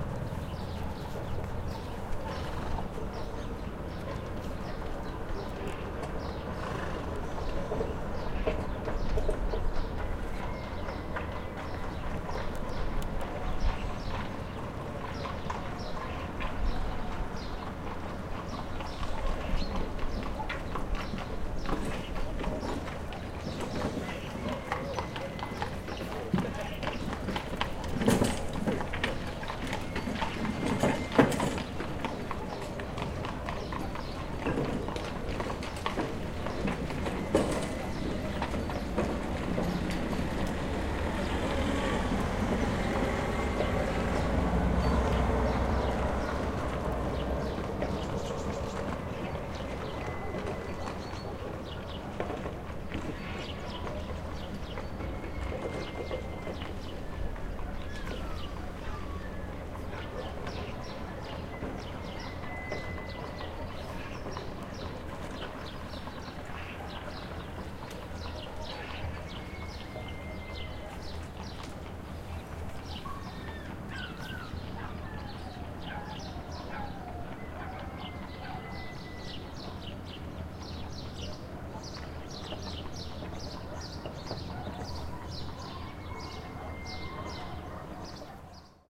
Two Carts passing in the streets of Gheorghieni, 9 AM with dogs and birds and a car. Recorded with a Zoom H4 on Saturday March 20, 2010.
2, birds, car, carts, dogs, horse, morning, romania